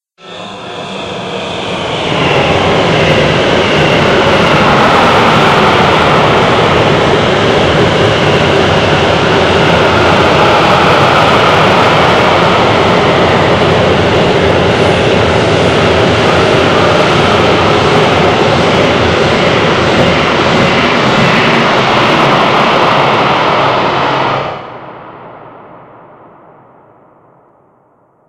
Sound created by applying GVerb and Delay in Audacity. Might be useful for spacey noises or a ufo passing by.
FYI: solar wind isn't actually wind at all. It's radiation
cosmic, delay, gverb, pass, pass-by, passing, Solar, space, sun, swoosh, wierd